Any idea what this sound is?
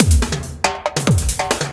70 bpm drum loop made with Hydrogen
electronic, beat